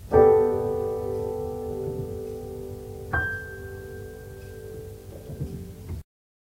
Piano Chord G
Some snippets played while ago on old grand piano
grandpiano, major, chord, piano